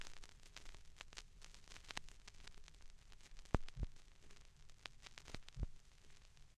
vinyl record noise
quasi